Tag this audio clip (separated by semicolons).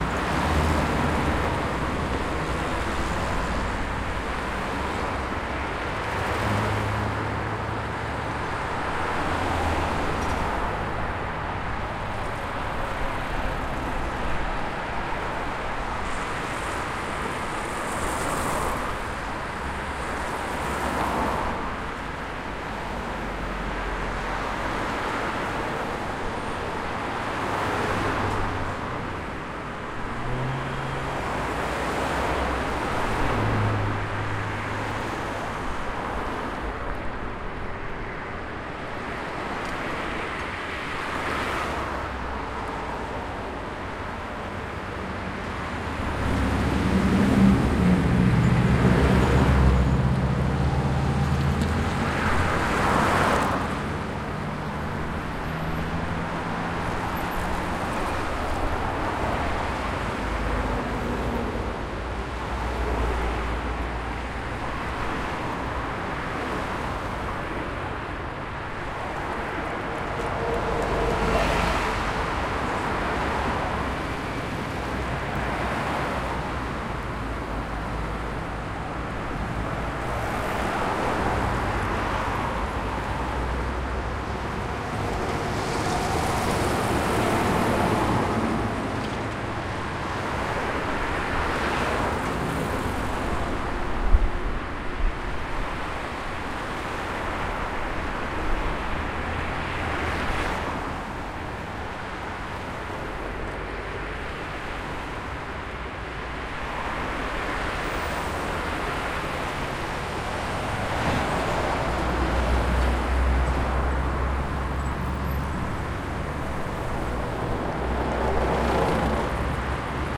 2012; bridge; cars; noise; Omsk; roar; rumble; Russia